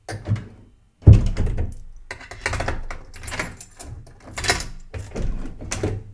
closing bedroom door
closing, door